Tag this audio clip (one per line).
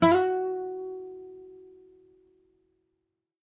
acoustic
asp-course
guitar
mediator
nylon-guitar
oneshot
single-notes
slide